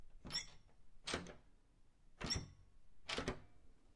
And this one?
door handle
door, field-recording, handle, movement